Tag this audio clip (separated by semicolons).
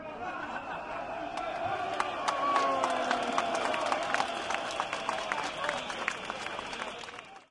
labour; whistle; demonstration